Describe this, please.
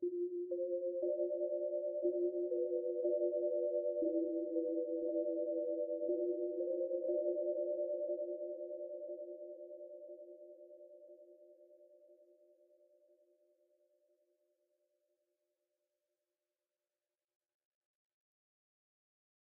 A short string of notes, reminded me of rain falling. Loop it an use as background to a moody scene.